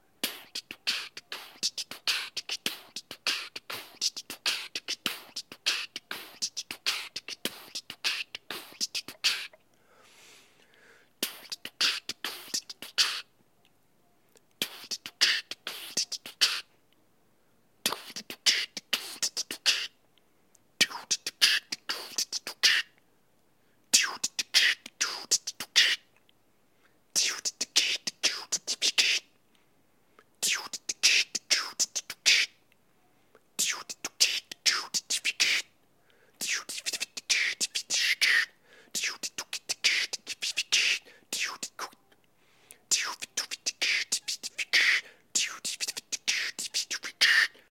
closed-mouth beatboxing. Various scratch sounds and other SFX - all done with my vocals, no processing.
bass, beatbox, beatboxing, chanting, drum, drums, industrial, loop, looping, loops, male, mansvoice, percussion, strange, tribal, vocals, whish, whoosh, wipe